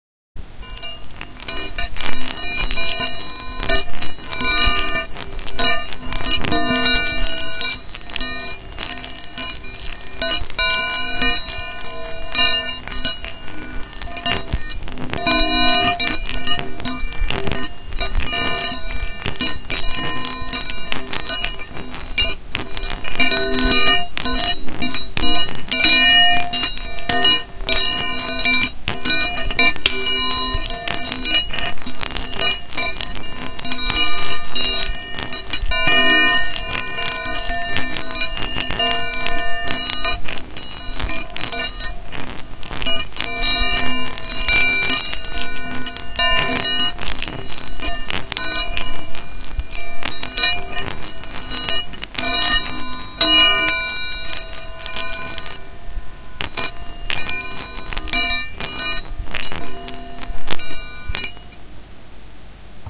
I used a TASCAM DR-07MK2.